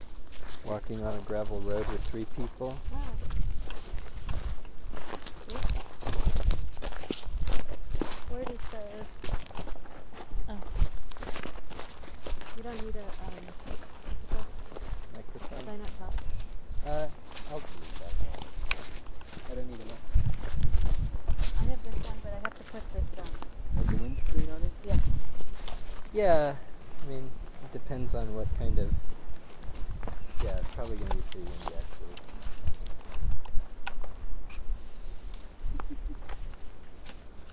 000102 0178S4 gravel road walk
Walking on gravel.NTG-2, Tascam-DR60D